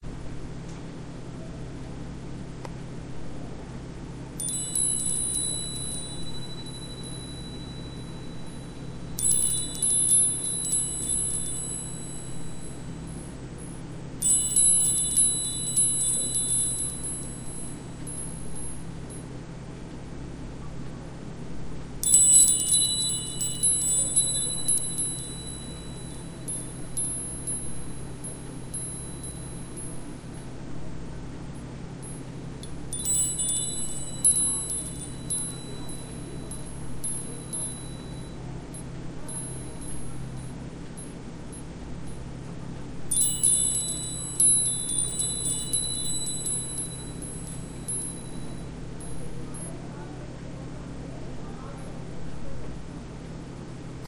Delicate musical metal wind chimes.